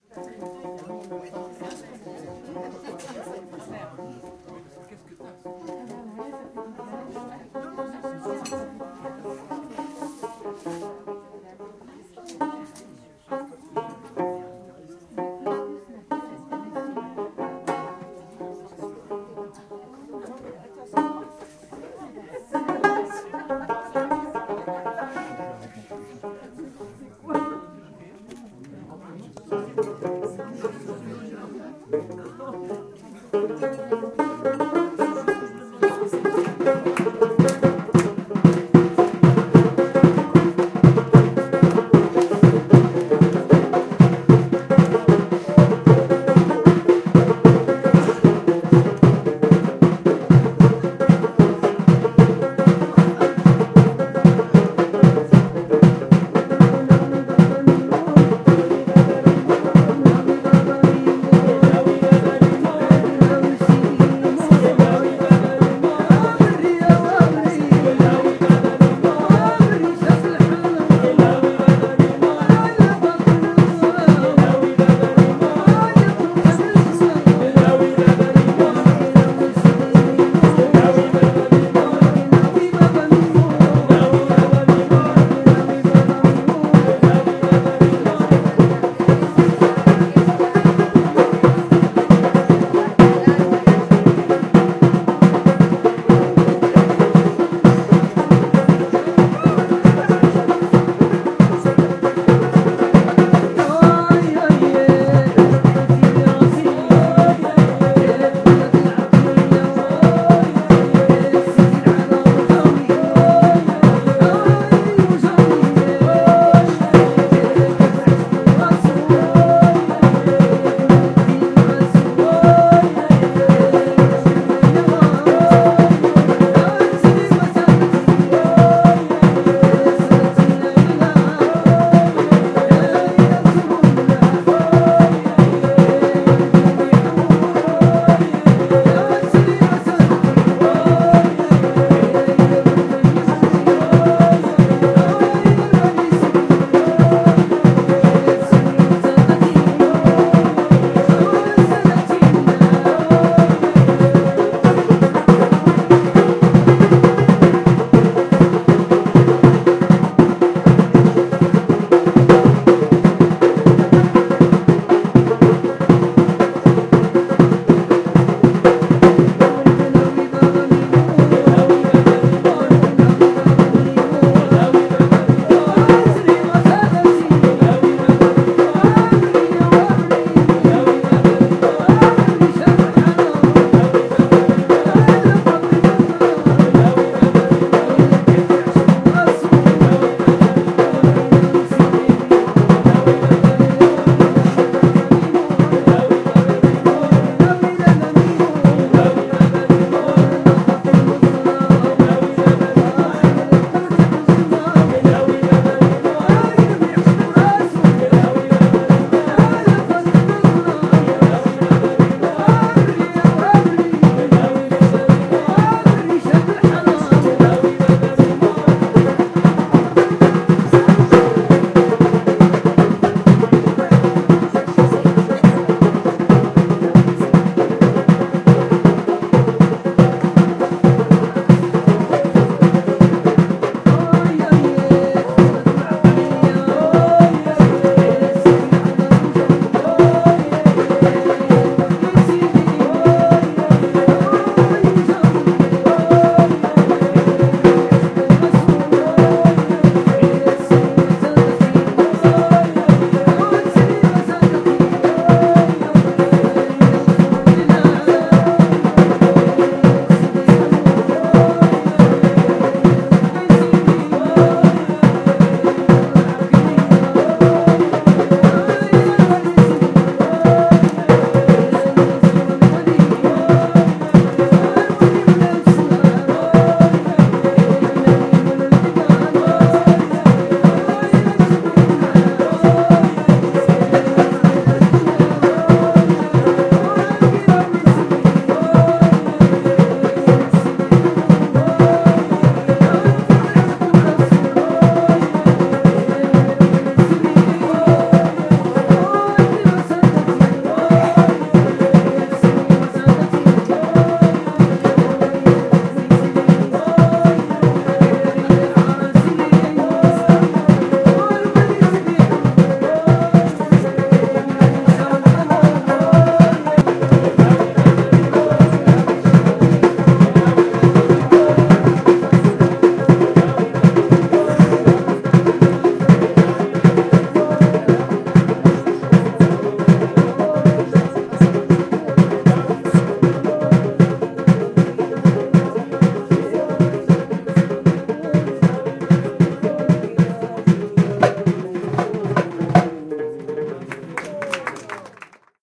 005A 100107 0658-1 MOR TraditionalMusic
Moroccan traditional music (2nd file)
This field recording has been made during a trip in Morocco. A band was performing some traditional Moroccan music for a group of tourists in a small restaurant.
You can hear drums (darbuka and taarija), a string instrument (probably a kind of gumbri, men singing, and in the background, people chatting and eating.
Kindly recorded in September 2015 by Laurence Luce, with a Yamaha pocketrak C24.
Fade in/out applied in Audacity.
drums, taarija, percs, strings, derbuka, ethnic, rhythm, loutar, instruments, voices, field-recording, gumbri, darbuqqa, derbouka, traditional, atmosphere, lotar, darabuka, doumbek, Morocco, men, darbouka, gambri, percussions, guembri, soundscape, music, gembri, darbuka, ambience